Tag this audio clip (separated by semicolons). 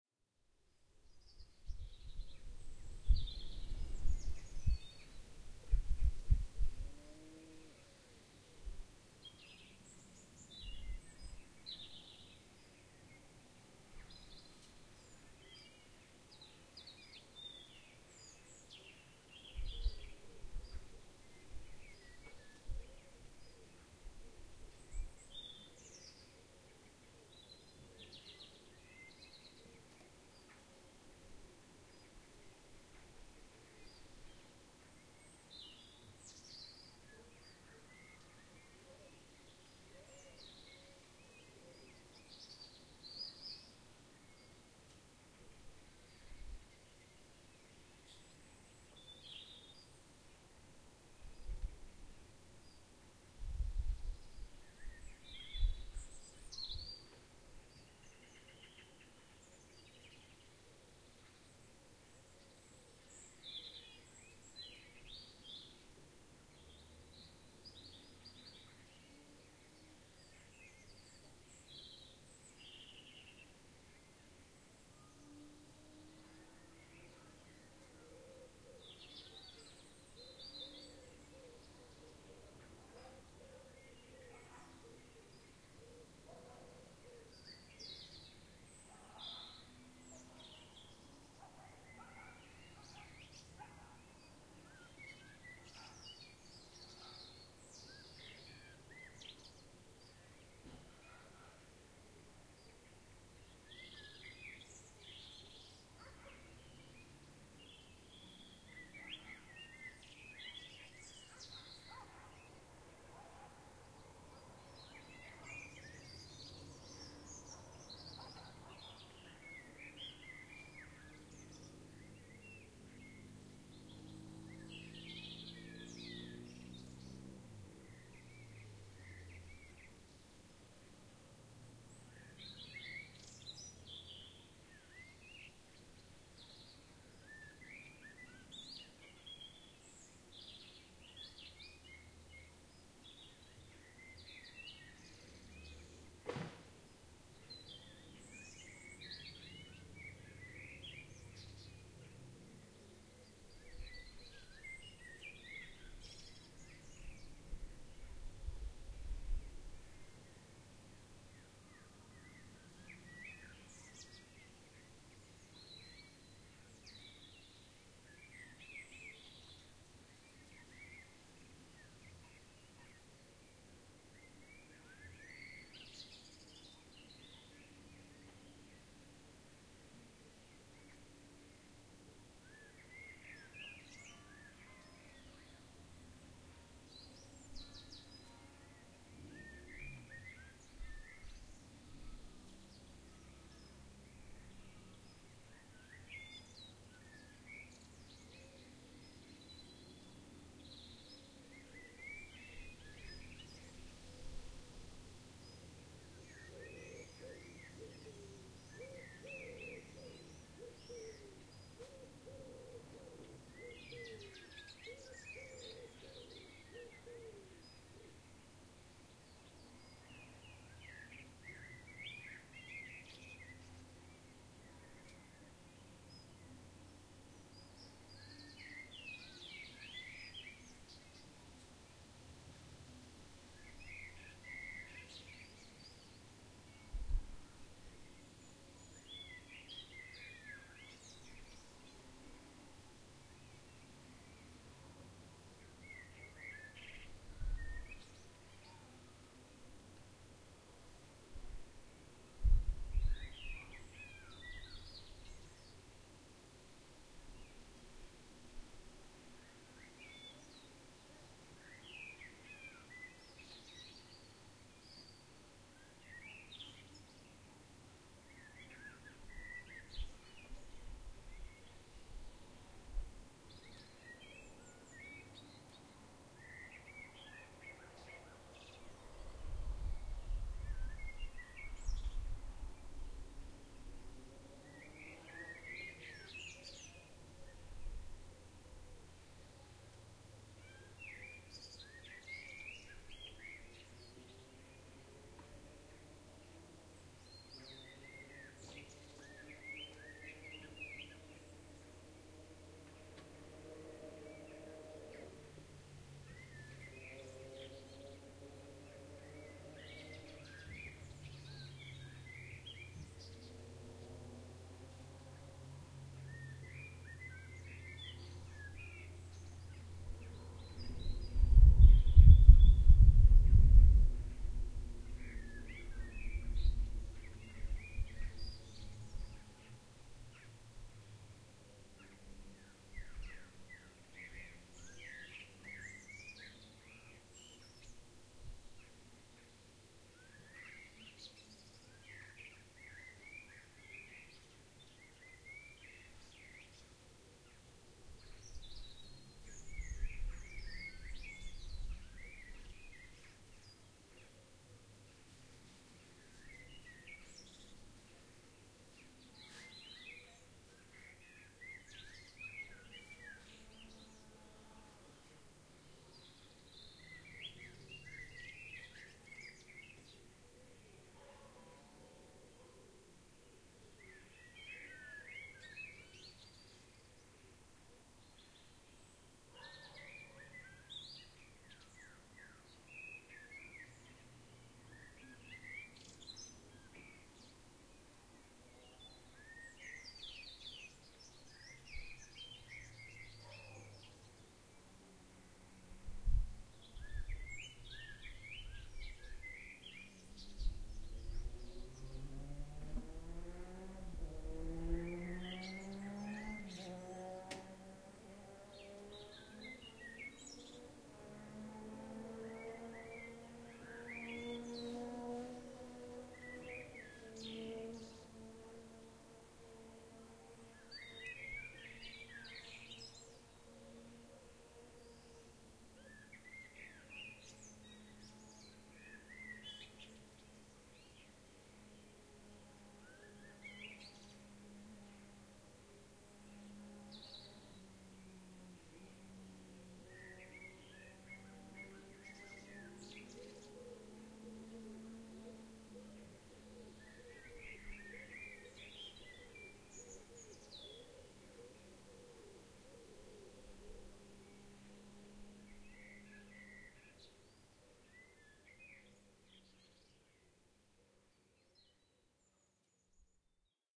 Birds; Birdsong; Environment; Evening; Field-recording; Morning; Nature; Peaceful